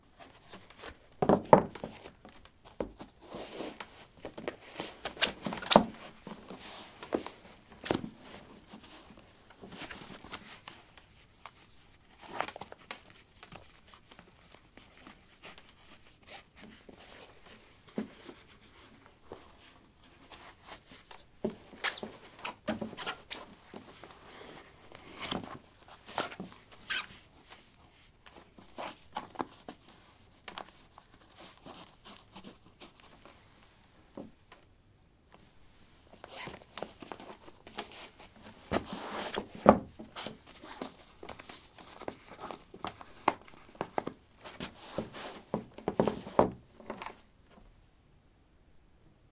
Putting squeaky sport shoes on/off on a wooden floor. Recorded with a ZOOM H2 in a basement of a house: a large wooden table placed on a carpet over concrete. Normalized with Audacity.